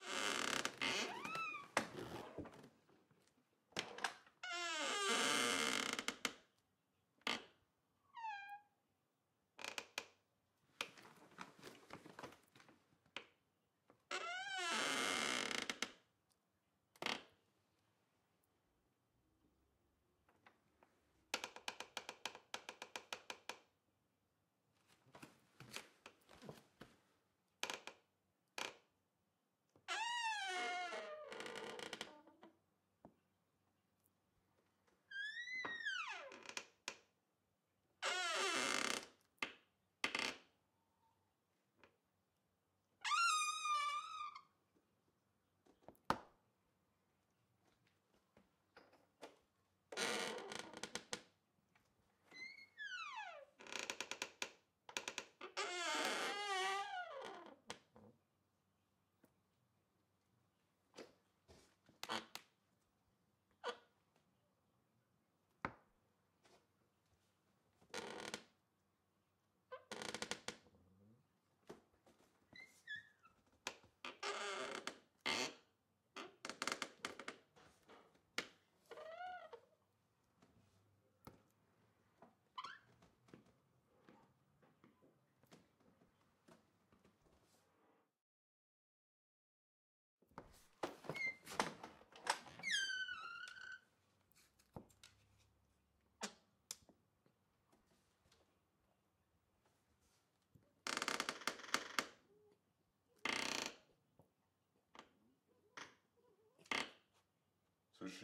wood bathroom door creaks medium
wood, medium, door, bathroom